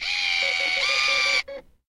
digital camera, zoom in